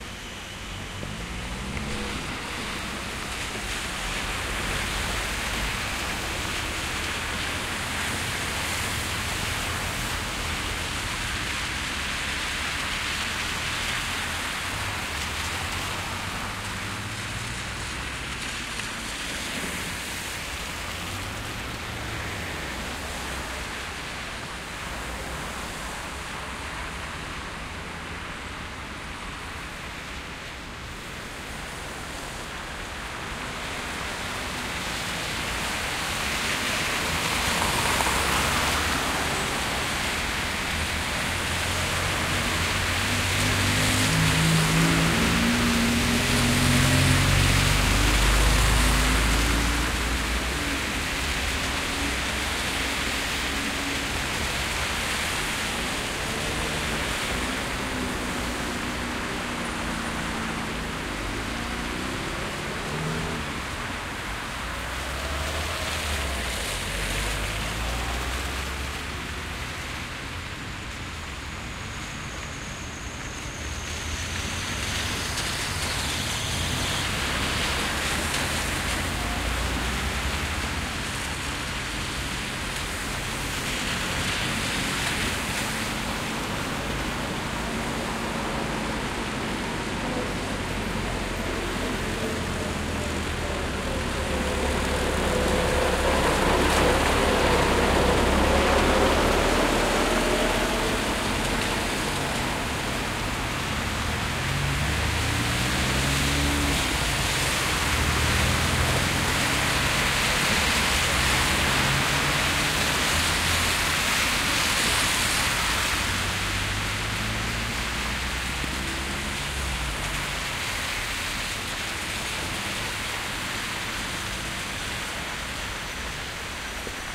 Cars driving slush road
Wet city street. Snow and rain. Dirt, mud and slush. Cars driving on the road.
Recorded: 14-06-2013.
XY-stereo, Tascam DR-40, deadcat